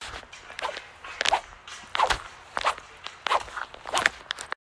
A brief whipping sound. Good for branches or rope swinging.
whip, whipping